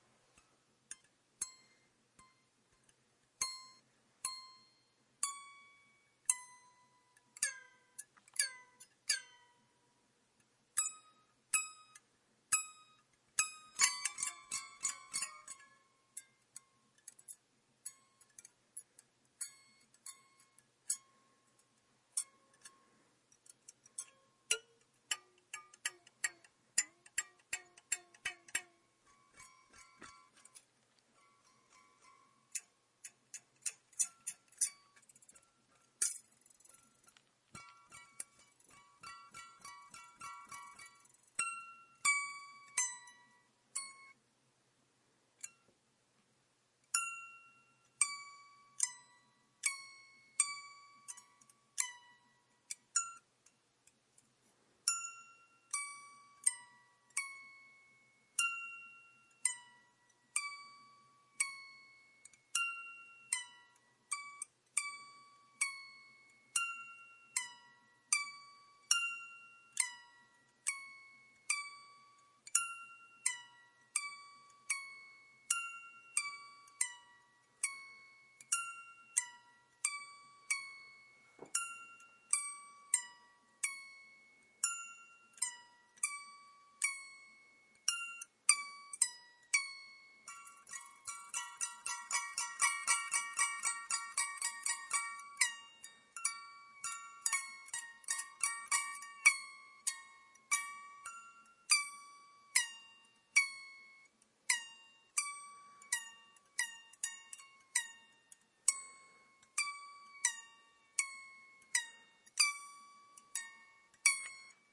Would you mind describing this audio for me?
Strumming on the broken egg slicer
Is a few days back, when cutting wires bursted on a egg slicer, so I decided play a little with before throwing it to bin.
creaking, egg-slicer, strumming